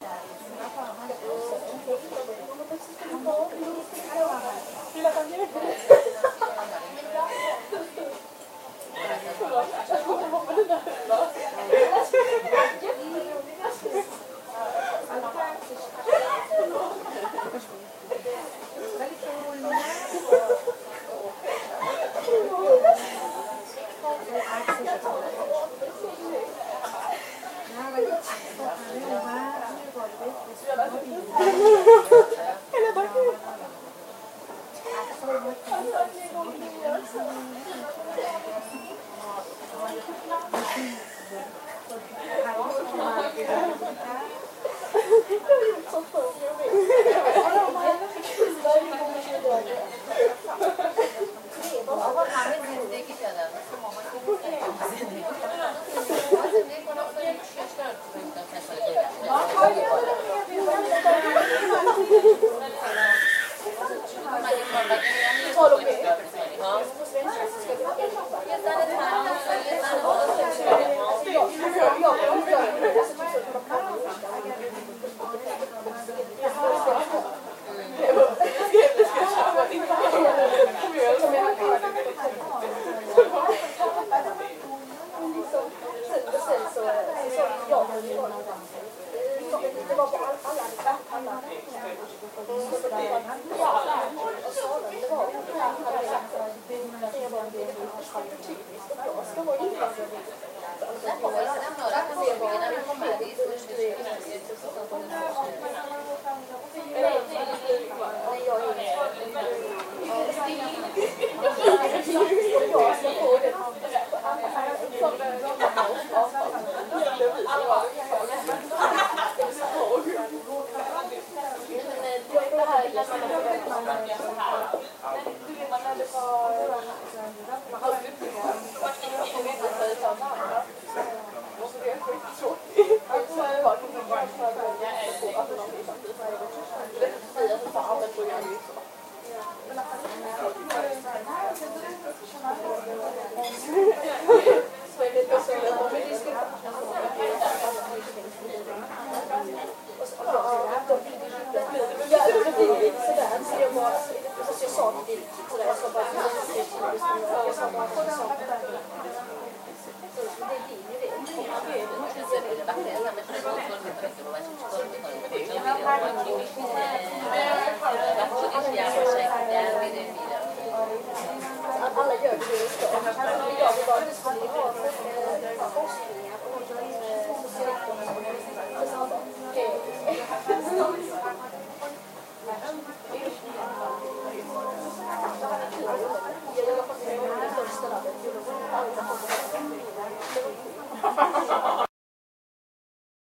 Sound from a coffee shop.
background, coffee